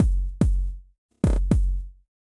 flstudio random actions
experimental, glitch, procesed